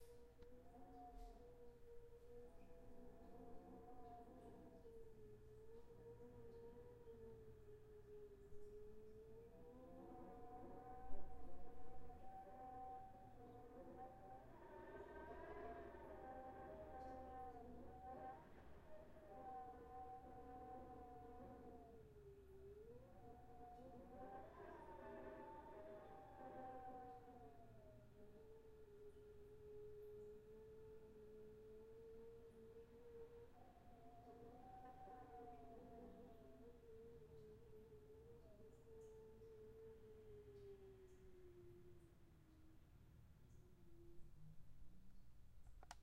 Wind whistling through the edge of the window.